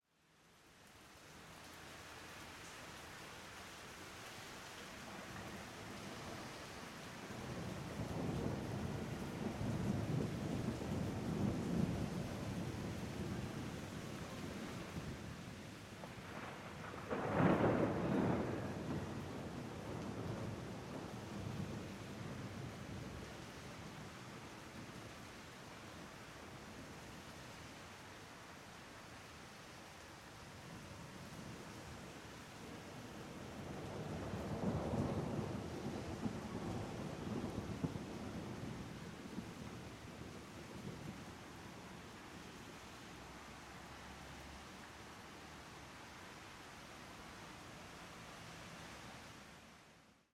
orage+pluie2 (b2)
A storm in Paris recorded on DAT (Tascam DAP-1) with a Behringer B2PRO by G de Courtivron.
storm, paris, rain